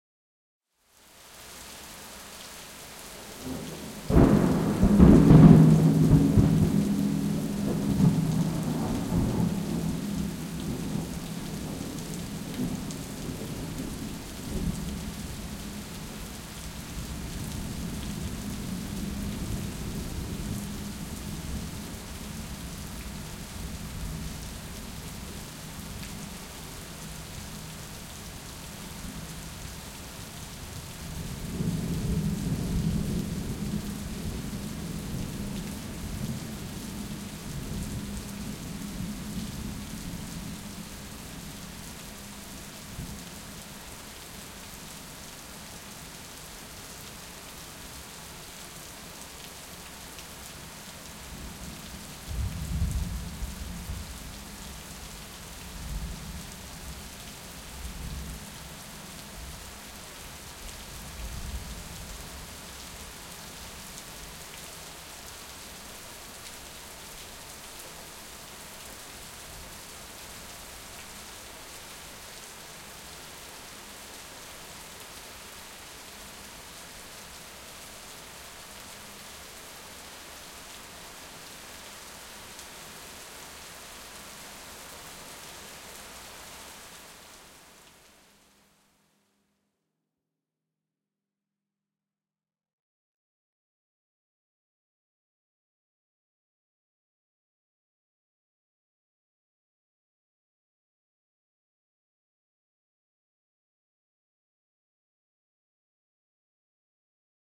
Field recording of heavy, fat rain with not much wind. Three or more really loud distant lightning strikes and rolling thunder. Southeast Louisiana.